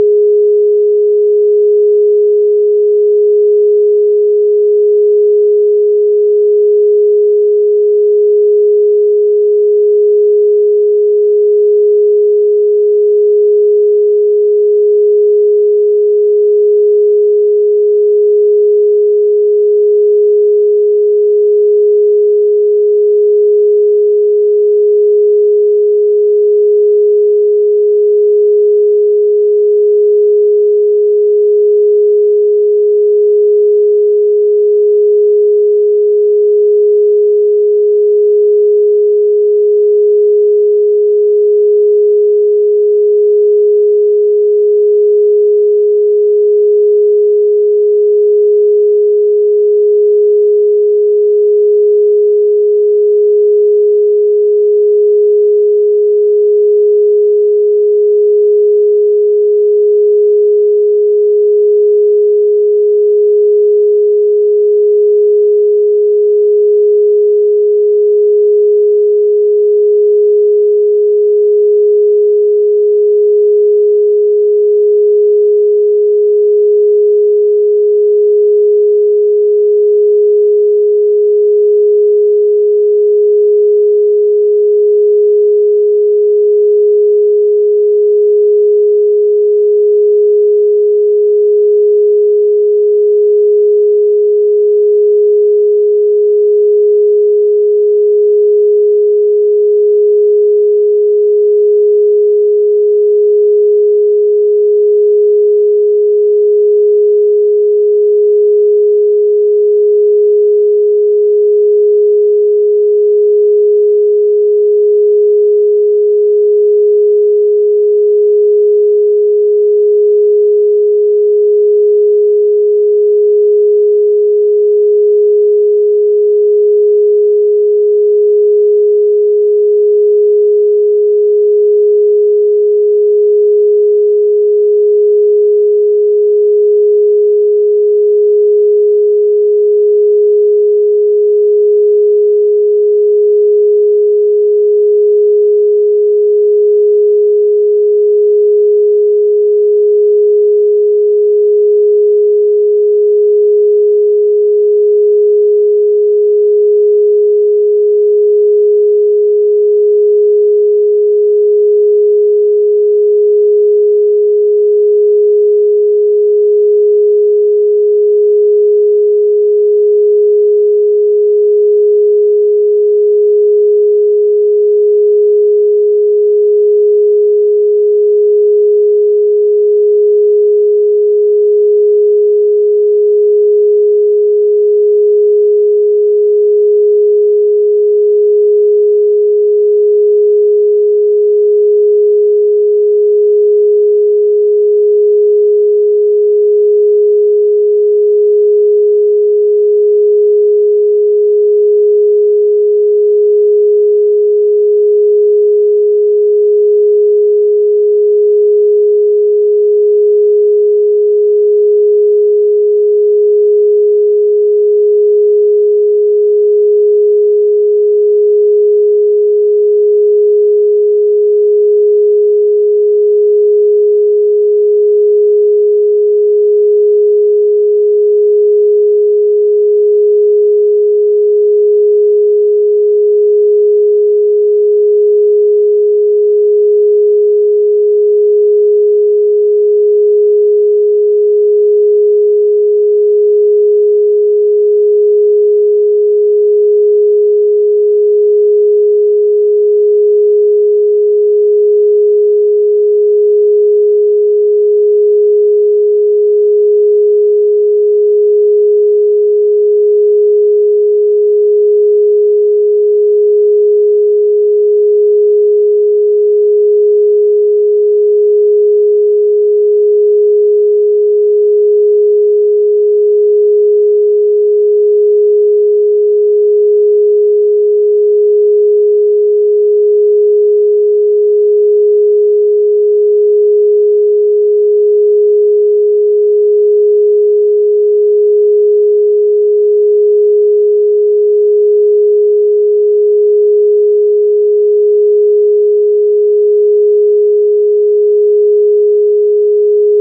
417Hz Solfeggio Frequency - Pure Sine Wave - 3D Spin
May be someone will find it useful as part of their creative work :)